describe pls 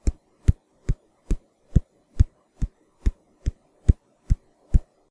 Recorded by mouth
jog, jogging, fast-walking